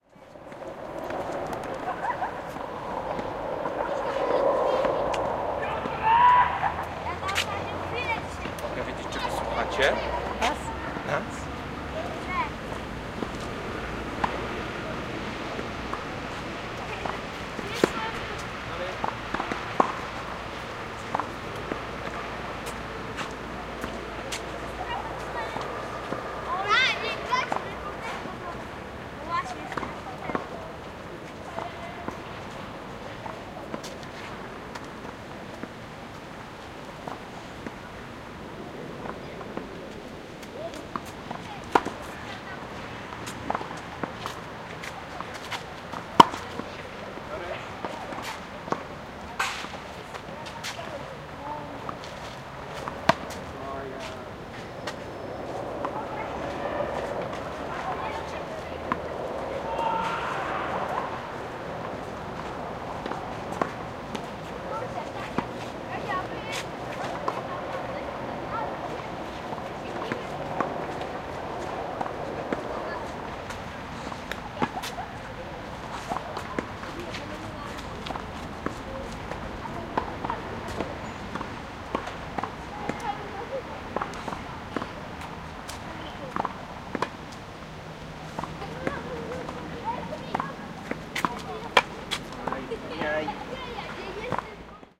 hi-fi szczepin 01092013 tennis on lubinska street
01.09.2013: fieldrecording made during Hi-fi Szczepin. performative sound workshop which I conducted for Contemporary Museum in Wroclaw (Poland). Sound of tennis game in Szczepin recreation Center on Lubinska street. Recording made by one of workshop participant.
marantz pdm661mkII + shure vp88